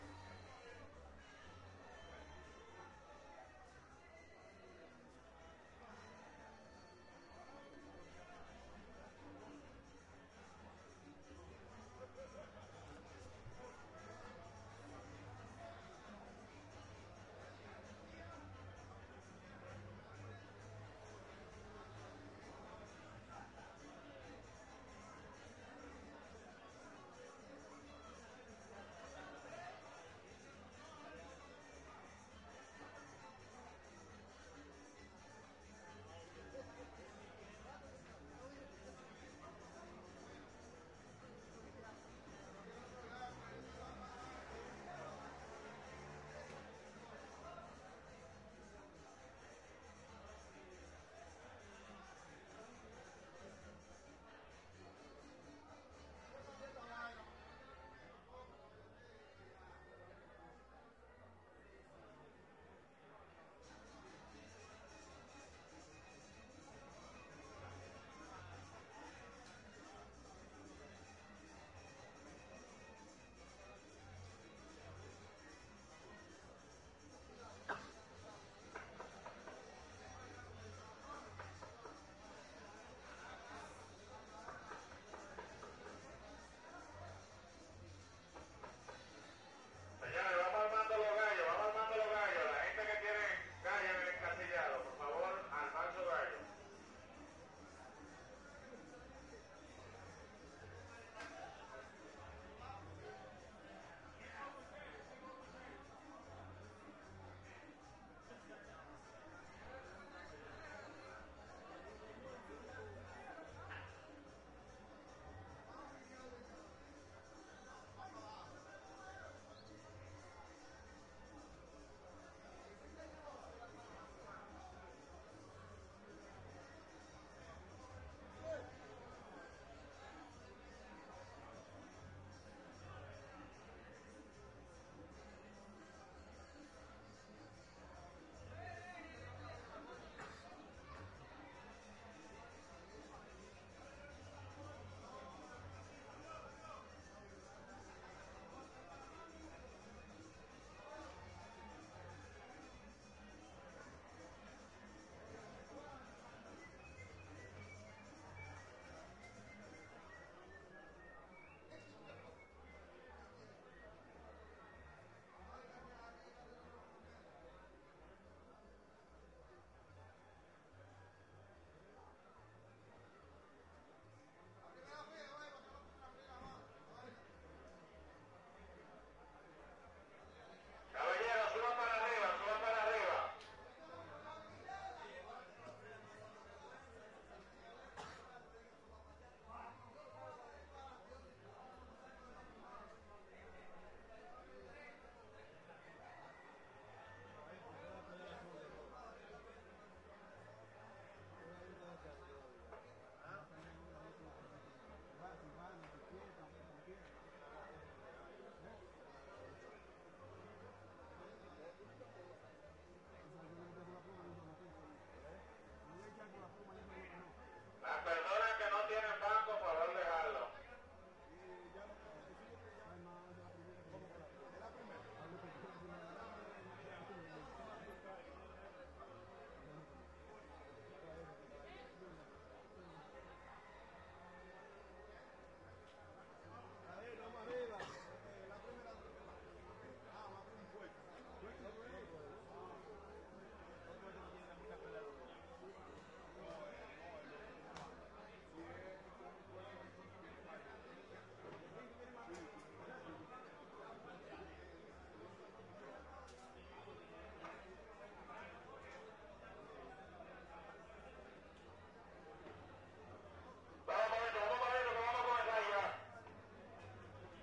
Las Terrenas-May 17-Gallera-pre
Soundscape recording of the pre-fight at a gallera in Las Terrenas, on the Samana peninsula in the Dominican Republic. May 17, 2009.